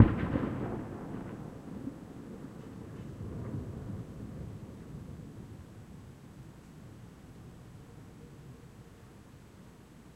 Large explosion - dynamite during a fiesta in the Sacred Valley, Cuzco, Peru. Long natural mountain echo.Recorded with a Canon s21s.